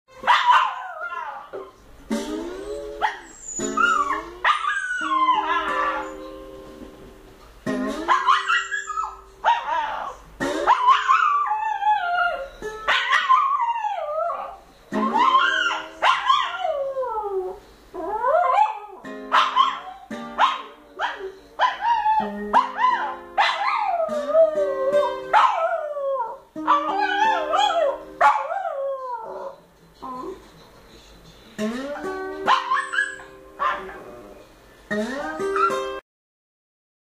My beloved dog Malu singing while I play slide guitar. Guará, Distrito Federal, Brazil.